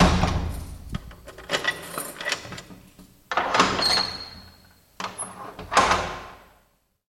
Cellule intérieur
Inside a prison cell when the door is closing
cell, closing, door, inside, prison